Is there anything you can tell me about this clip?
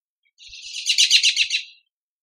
Bird Fly-by
Then used Audacity to remove noise and amplify (changed to 16-bit on export for those wondering). The bird literally buzzes by the x-y mics while whistling. Enjoy =D
bird, field-recording, tweet, whistles